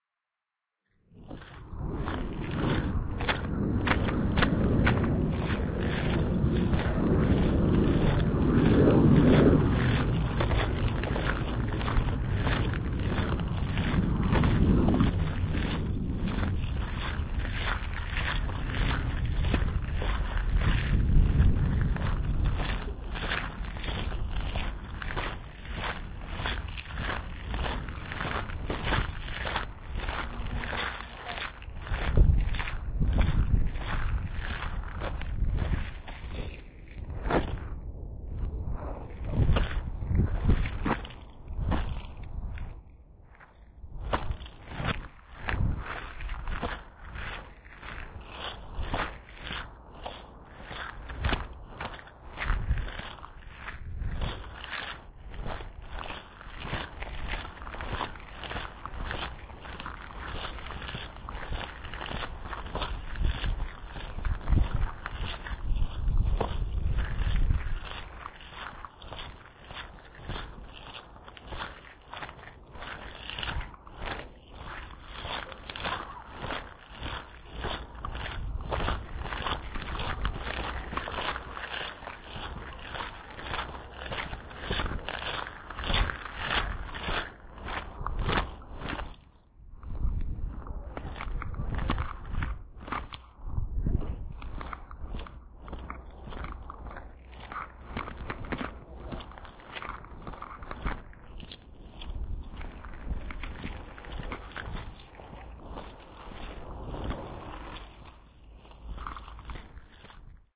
Parque da Cidade